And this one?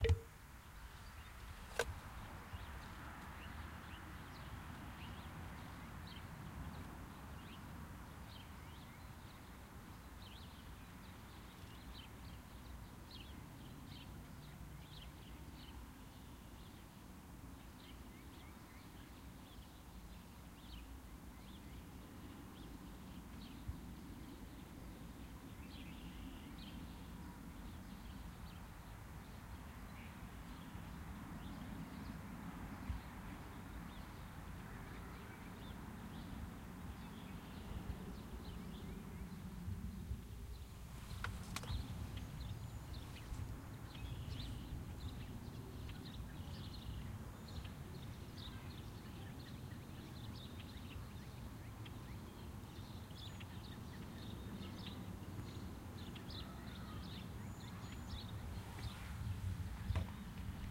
Outdoor ambience(quiet)
Second Field Recording of a small quiet suburban area. Robins and sparrows can be heard chirping and moving. faint conversation can be heard occasionally as well occasional cars are heard in the distance. Recorded Tascam Dr-40 (16, 44.1)